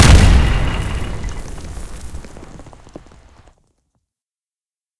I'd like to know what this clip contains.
A bass boost, low pass filter, and some other stuff.
bang, debris, exploding, explosion, rubble, war